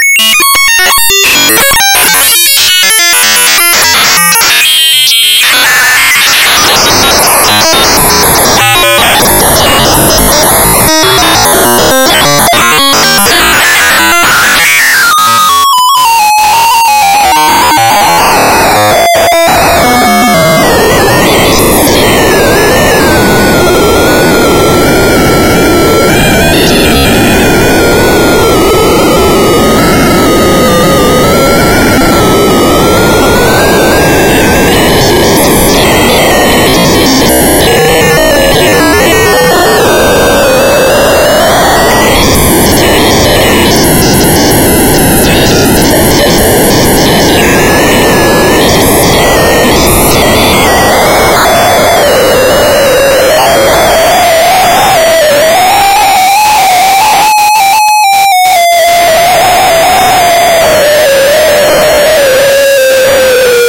glitchy modem-type noises #3, changing periodically a bit like sample and hold, random walk through a parameter space, quite noisy. (similar to #5). these sounds were the results of an experimental program i wrote to see what could be (really) efficiently synthesized using only a few instructions on an 8 bit device. the parameters were randomly modulated. i later used them for a piece called "no noise is good noise". the source code was posted to the music-dsp mailing list but i can't find it right now.
glitch, noise, noisy, synthesized